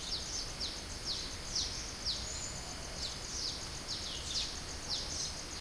Bird rainforest01
Record around my house Sony MZ-NH700 Sony Sony ECM-DS30P
birds, lumpur, malaysia